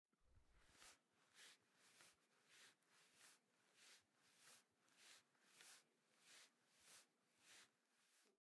Laundry basket against clothes
Dropping a laundry basket onto carpet. Recorded with an H4N recorder in my home.
basket, laundry, drop, clothes